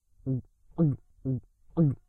Drink gulp
The sound of someone drinking a drink of some sort.
drinking, gulp, thirsty